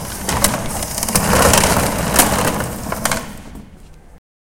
Low Tech Humanoid Chair Laborer
Labor. It is the labor of the unskilled, the precariously employed, the affective laborers, the manual and technical laborers, through which life is reproduced materially and symbolically. The world is made in time by us, but not for us; it is, as one might have said in another time more optimistic than our own, up to us to become self-conscious of our collective world-making power as laborers and re-claim it for ourselves.
We can hear this process of world-making. We can trace this process of world-making through the critical-poetic practice of field recording. To listen to and record the aleatory sounds of urban environs is to hear the alienated character of work and seize it as a site of opposition and invention. Re-composition is the means and end. Field recording is a revolutionary sonic praxis; composition is the extension of the field of struggle and domination into the sphere of form.
field-recording, urban, ambience, drum-kits, sample-pack